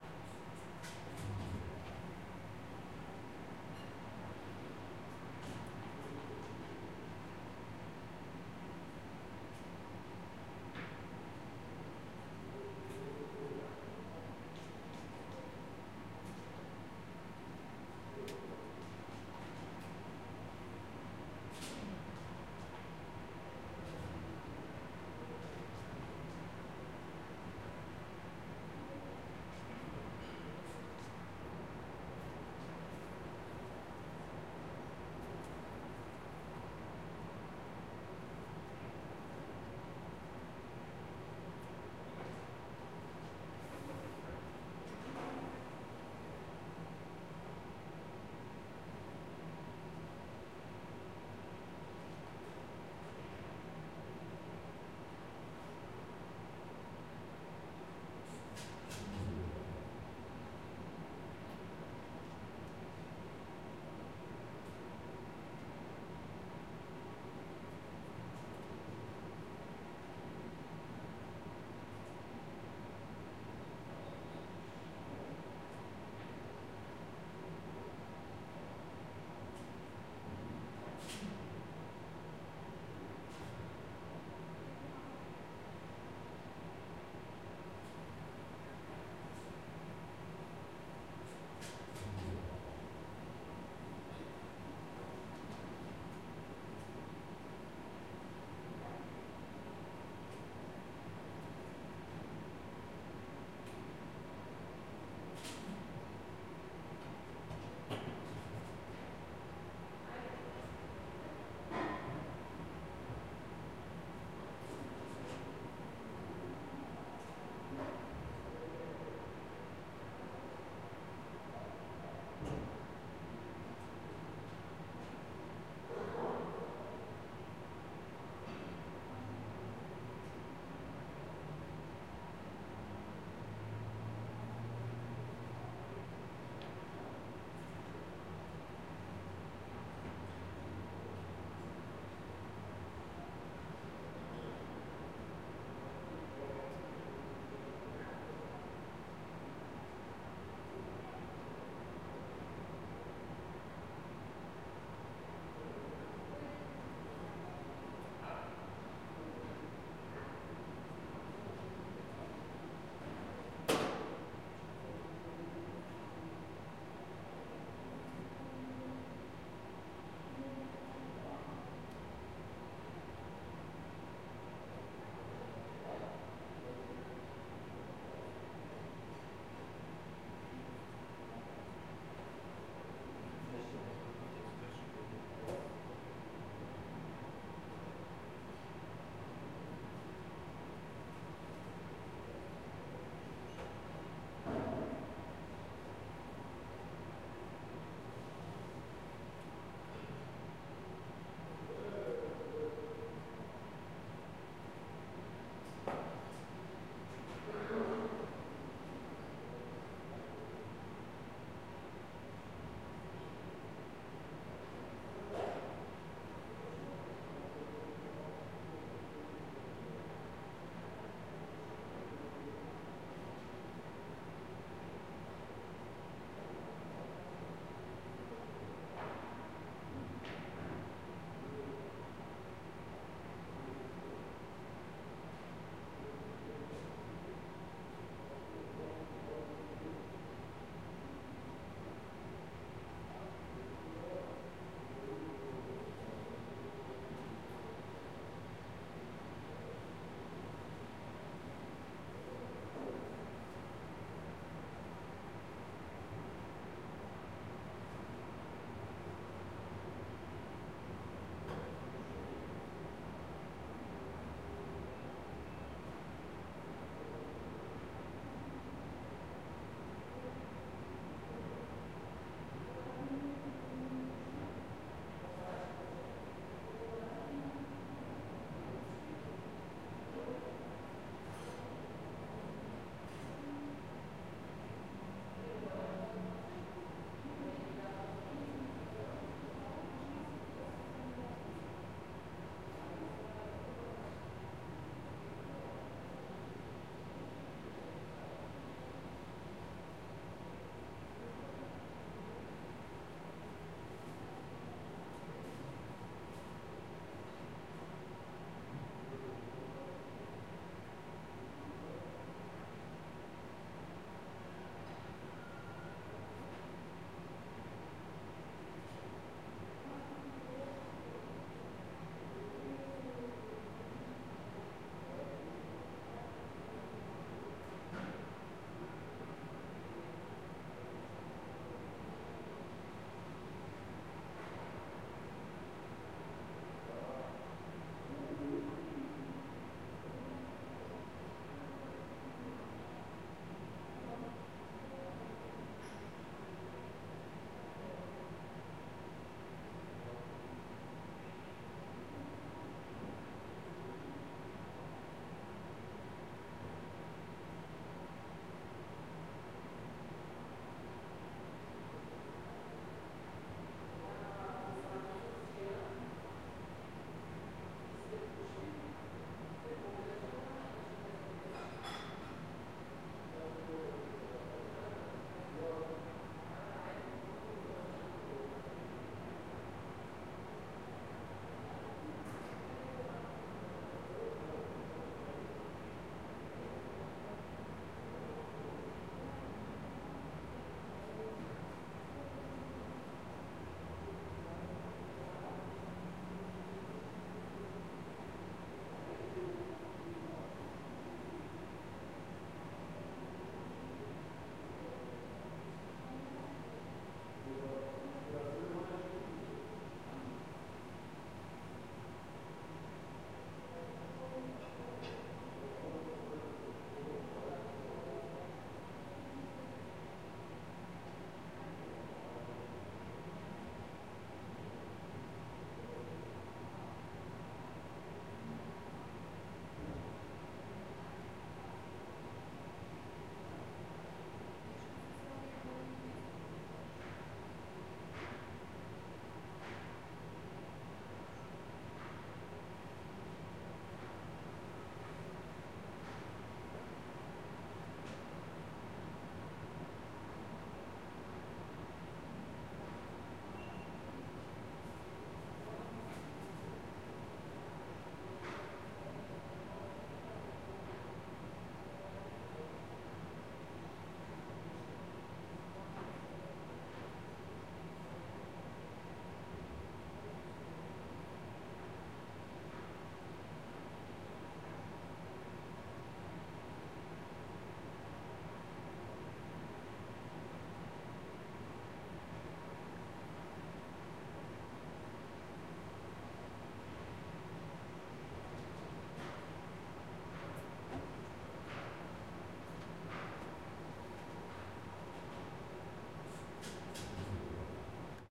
Ventilation, walla, elevator, residential hallway
ventilation, industrial, hallway, noise